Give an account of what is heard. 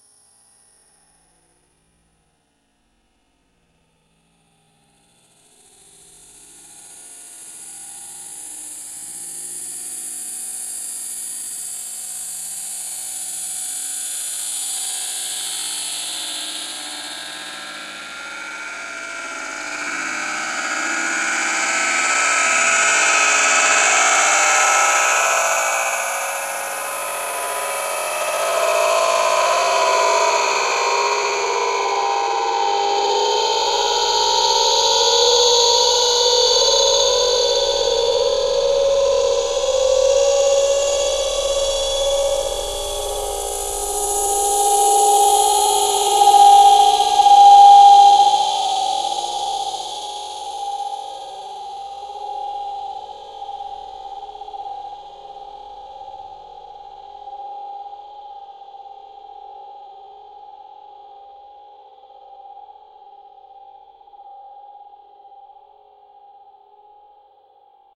Metal Ghost
A sound I made by stretching and reversing vocals.
Metal, Ghost, Spirit, Mechanic